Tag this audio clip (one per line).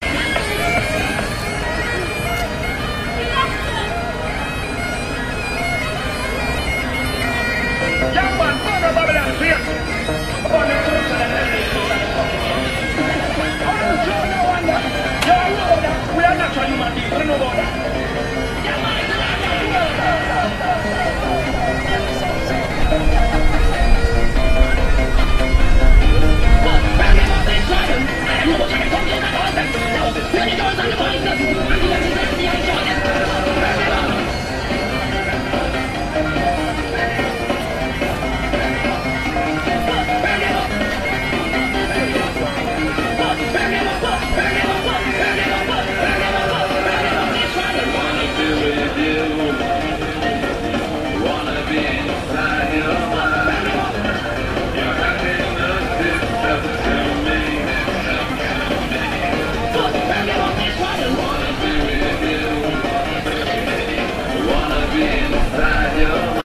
Traffic
London
Public